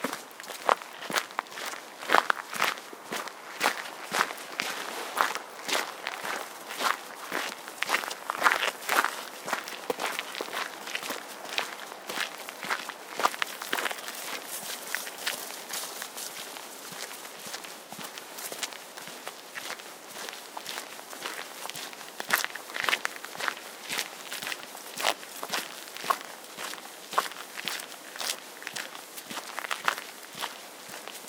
Going on a forest road gravel and grass
Recording while we're walking on a forest road
with both gravel and grass.
footstep, step, footsteps, steps, walking, forest, walk, grass, foot, gravel, feet